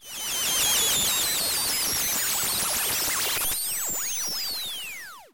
Bonus score 1/RPG attack move squeaking
A squeaky waveform that speeds up over time and then releases.
Created using Chiptone by clicking the randomize button.
8-bit, 8bit, arcade, chip, Chiptone, game, pinball, retro, SFX, squeaking, squeaky, video, video-game